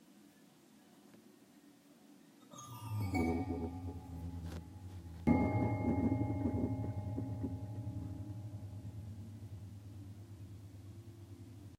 I recorded the reverberation coming from an old toy piano after hitting the keys.